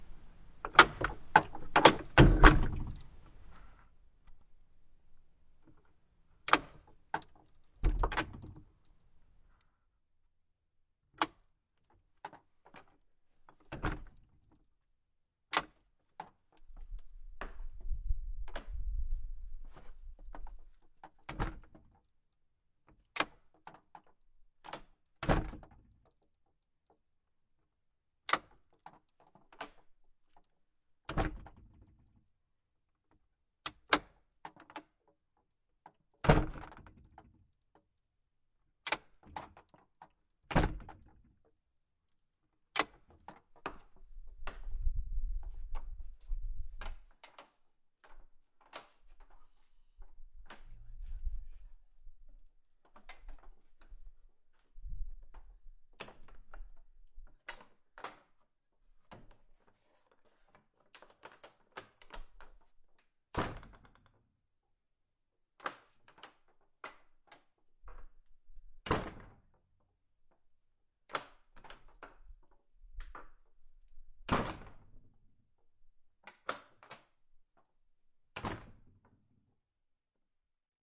door wood old heavy cellar door with metal latch in basement open close left side onmic right side offmic
cellar, close, heavy, latch